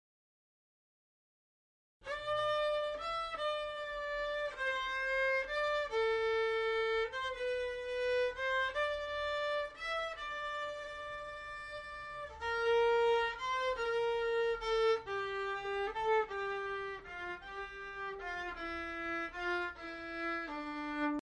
Violin sound melody on E string
A little violin melody on E string.
non, Violin